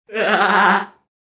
Sarcastic Cartoon Laughter
Weird laugh (cartoon style).
Cartoon, disgusting, laugh, laughter, nasty, sarcastic, voice, weird